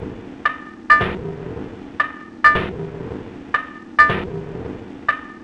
this is a filtered and looped version of the bottle sound which sounds like a hammer slamming on a piece of wood/metal